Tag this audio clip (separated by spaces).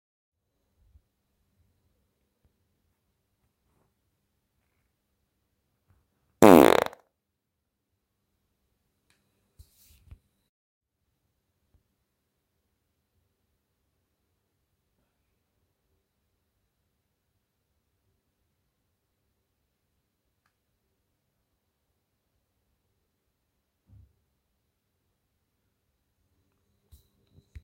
passing-gas flatulence fart